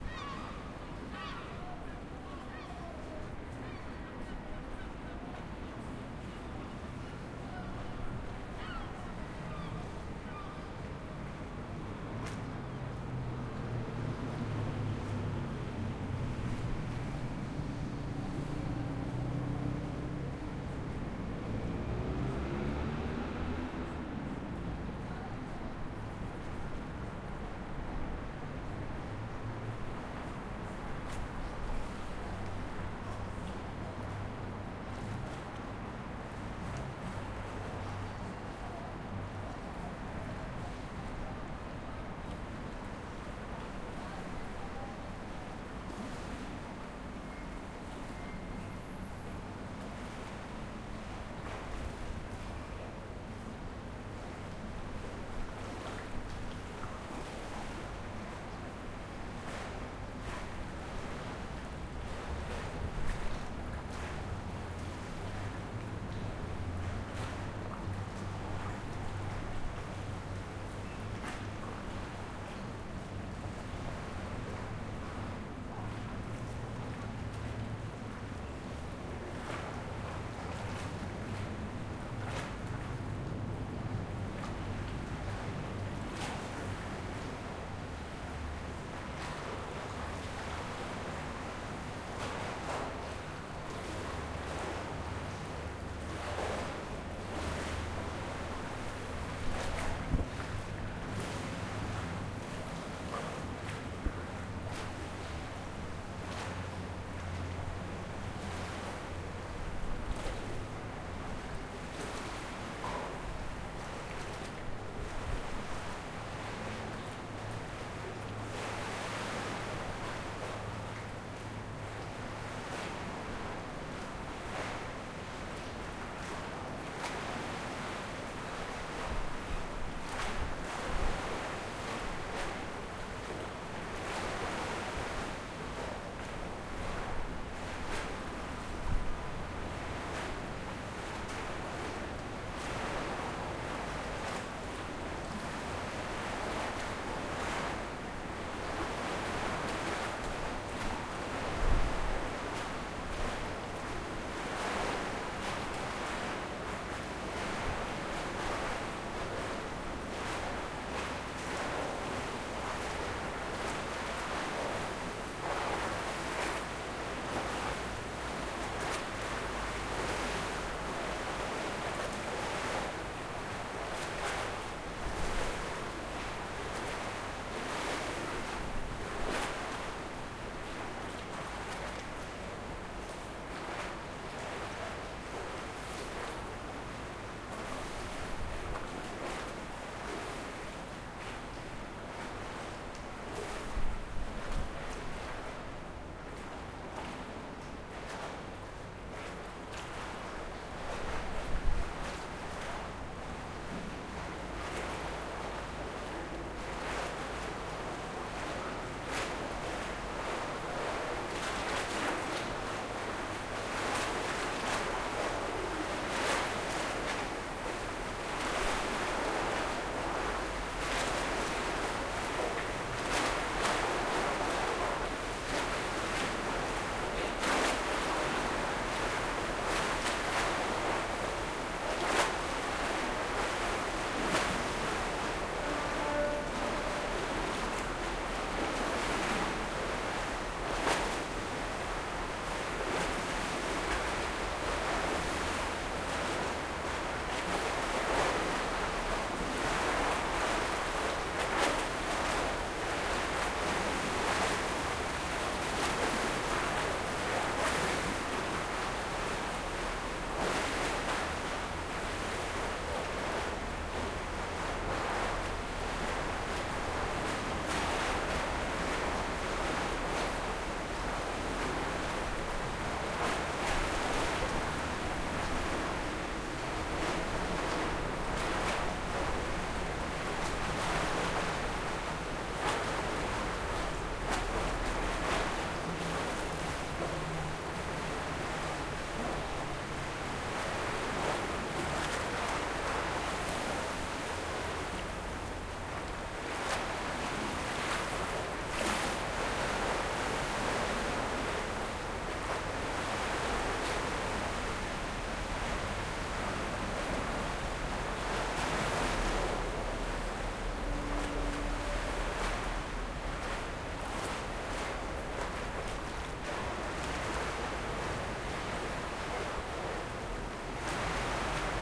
Hollow Wharf
Natural reverb hollow sound created with water sloshing back and forth underneath the Princess Wharf. The sound textures of the water changes with the tides.
Recorded by a XY Stereo Omnidirectional Microphone and ZoomH5 recorder.
Recorded on June 07th, 2015 under the Princess Wharf in Auckland´s Harbour, Auckland, New Zealand.
Recorded at 16:10PM